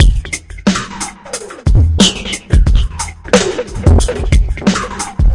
Zajo Loop22 02 spaceage-rwrk
a few experiments processing one of the beautiful hip-hop beat uploaded by Zajo (see remix link above)
dub phaser, compression